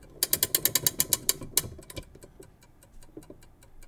Winding up a clock using winding key.